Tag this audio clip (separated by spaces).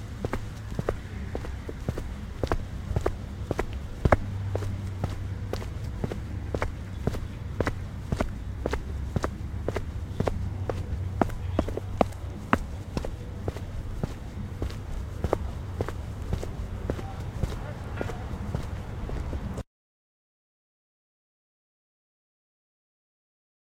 foot-steps field-recording outside